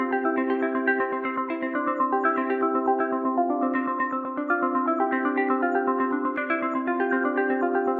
Arpeggiated Cmaj chord
This short sequence was created with the arpeggiator effect in Ableton.
Arpeggiator, Ableton, Sequence, Synthesizer